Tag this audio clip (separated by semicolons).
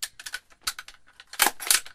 aug; magazine; load; rifle; click; airsoft; gun; metal; reload